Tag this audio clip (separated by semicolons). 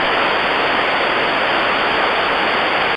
background-sound; ambient; white-noise; ambience; noise; atmosphere; general-noise; tv-noise; background